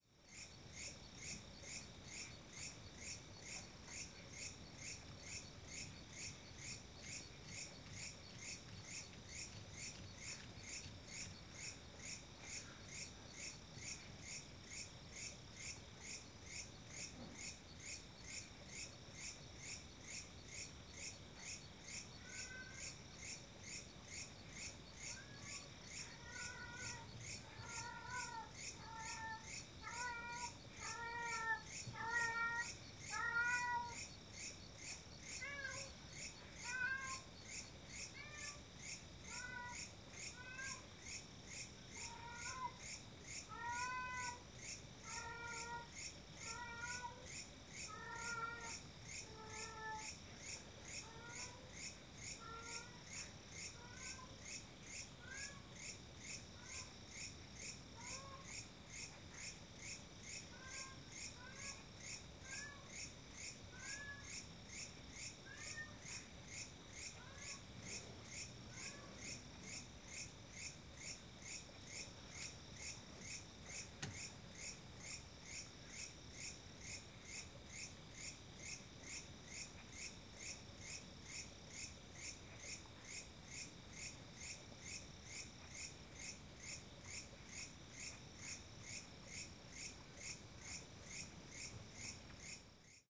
Night in a village in the jungle
Crickets by night and a cat meowing in a remote village in the jungle.
night, animals, meowing, nature, cat, forest, rainforest, field-recording, cicadas, insects, Lao, Asia